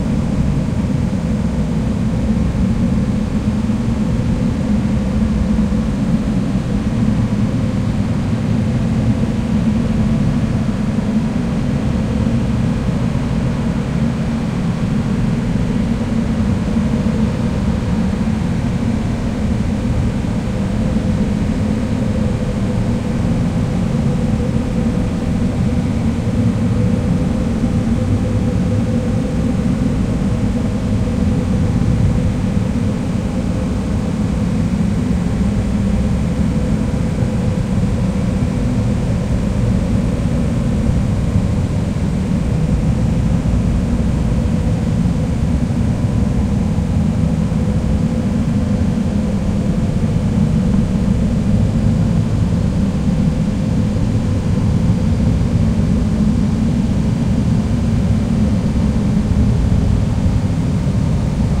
Dark Wind 2
Synthetic wind, dark and heavy.
Cinematic, Noise